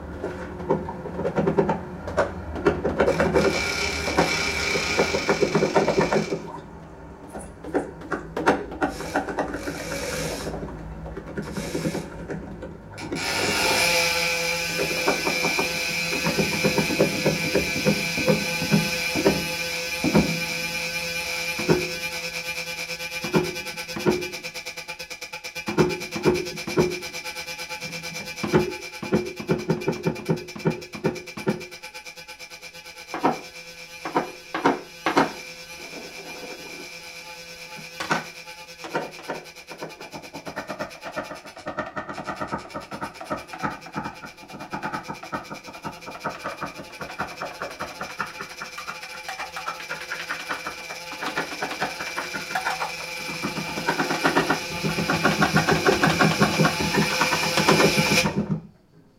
Scratching cello in electroacoustic music
This is a recording made in a rehearsal session for an electroacoustic orchestra. The cello's wooden body is scratched with hand and the resulting sound is transformed in an electroacoustic orchestra.
geo-ip, cello, scratching, creaking, electro-acoustic